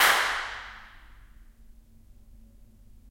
Clap at One Church Hall 10
Clapping in echoey spots to capture the impulse-response. You can map the contours to make your own convolution reverbs